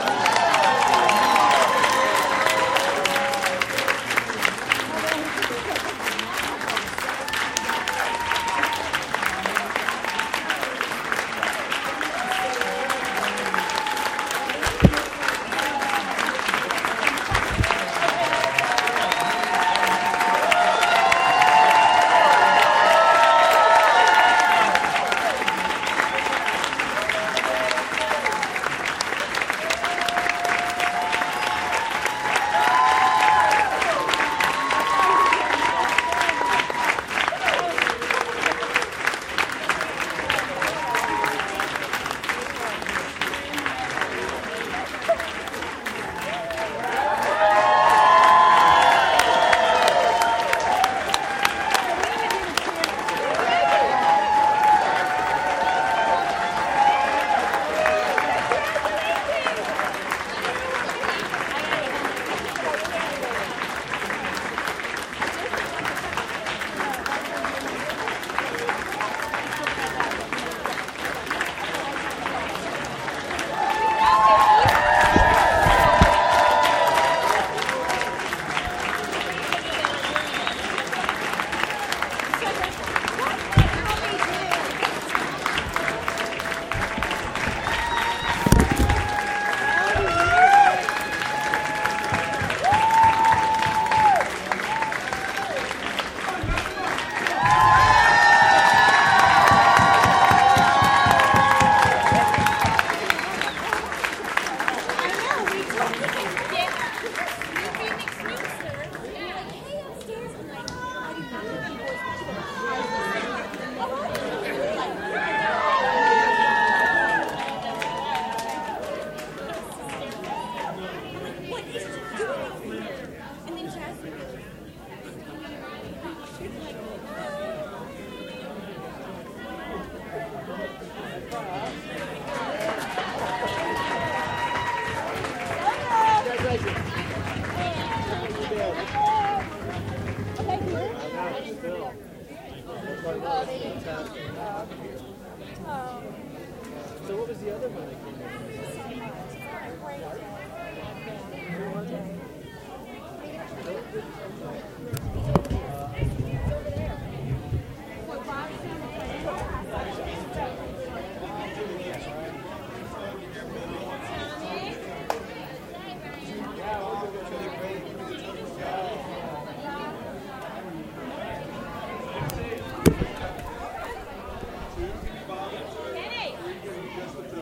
telethon cheering2m57s060213
At the close of the children's hospital telethon immediately after it was announced that more than $4 million had been raised, people on the phone banks started cheering, this as the closing credits were being run on the TV screen. This is non-descript cheering, my recording in a public setting having been invited as a journalist to be there to report for broadcast. This is the raw sound, including a few noices when my microphone got tapped. Edit, process to your hearts desire.
Petersburg-Florida, St, cheering, children